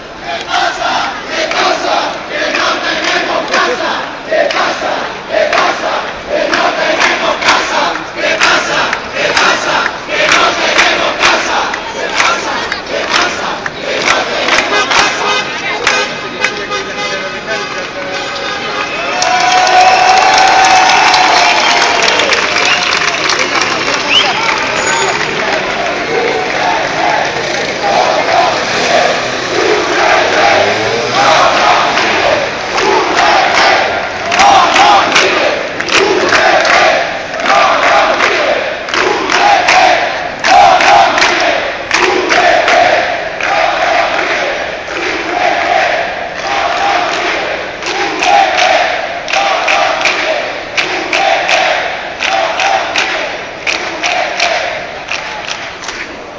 20110517.sevilla.demonstration.20.40
during mobilizations previous to upcoming elections, a crowd shouts slogans (in Spanish) expressing their dislike of major Spanish political parties. In this sample they sing: "Que pasa, que pasa, que no tenemos casa" (my translation, what happens, what happens, we can't have a home) and "No nos mires, unete" (Don't stare, join us). At 15s a passing car honks in support, which is followed by applause. This was recorded with a mobile phone at Plaza de la Encarnacion, Seville, so sorry for the poor quality of the sound
demonstration, field-recording, people, protest, shouting, slogan, spanish